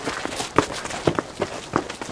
Running Slip on Gravel
Kid running slides on gravel around a turn.